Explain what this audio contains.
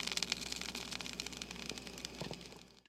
tiny sizzle Take 2
a drop of water fell on the surface of a coffee pot hot plate and made this sound as it bounced around.
SonyMD (MZ-N707)
spark, sizzle, electric, hot